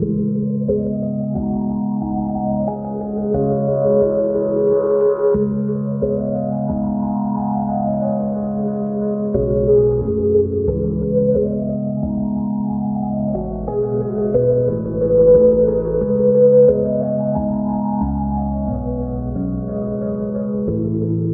Piano Ambiance 9 - [90bpm - Loopable]
Ambiance, Drums, Sound-Design